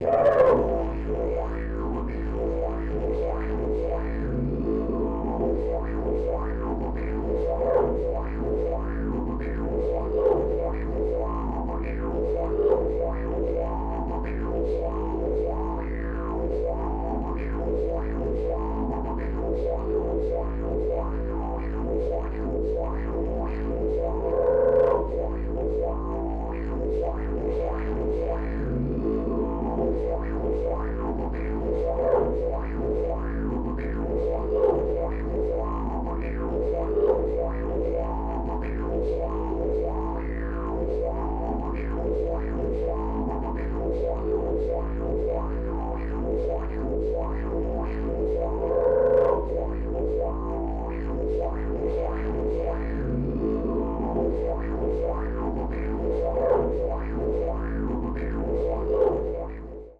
This sample pack contains five 1 minute passes of a didgeridoo playing the note A, in some cases looped. The left channel is the close mic, an Audio Technica ATM4050 and the right channel is the ambient mic, a Josephson C617. These channels may be run through an M/S converter for a central image with wide ambience. Preamp in both cases was NPNG and the instrument was recorded directly to Pro Tools through Frontier Design Group converters.